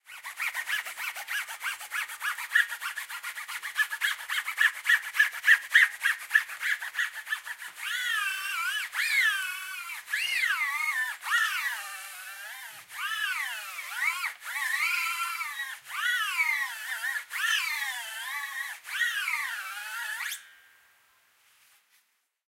Recording of a polishing, using a micro-fiber cloth, procedure, which was performed on an electric guitar.
The recording took place inside a typical room in Thessaloniki, Greece.
Recording Technique : M/S, placed 20cm away from the guitar fretboard, with 0 degrees angle, with respect the vertical orientation.